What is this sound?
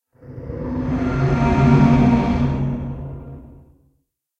Once more, a plastic soda straw in a 32-oz. plastic soft drink cup being scraped up and down in the lid. I made several different recordings of me manipulating the straw for these monster sounds to get variety, so it won't sound like the same clip over and over. The lower sounds are pulling the straw out and the higher sounds are pushing the straw in. Recorded with a Logitech USB mic and run through Audacity with gverb and pitch changes. Some of the tracks (there are about 4 or 5) are also reversed.
horror, howl, monster, roar, scream